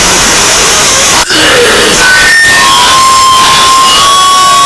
Loud! This is a short sample from a bit of noise I recorded. Some feedback and screaming...